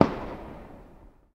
Single Firework
Boom, Distant